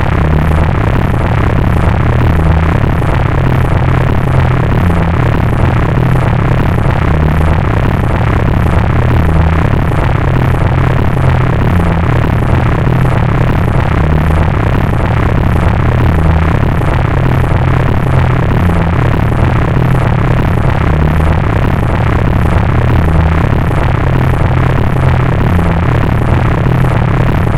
Sci-Fi Engine Loop
I accidentally made this by messing around with a test recording of my voice. I hope you enjoy it somehow!
abstract, strange, loop, sci-fi, sfx, electric, machine, sounddesign, future